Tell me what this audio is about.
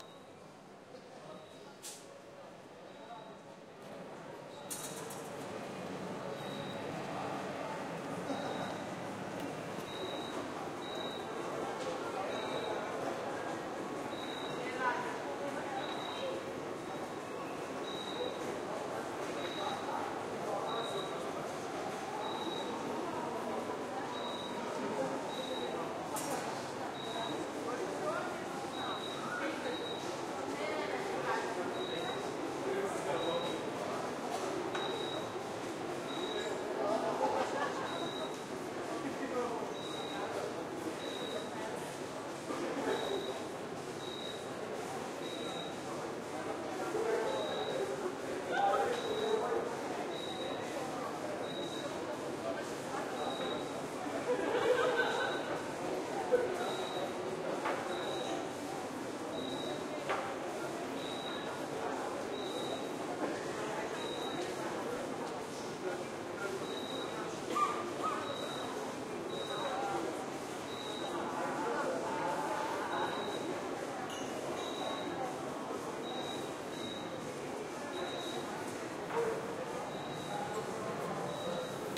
Outside Bars Night Skopje Ambience
City recording, bars, people talking, night
Ambience, bars, nightlife, skopje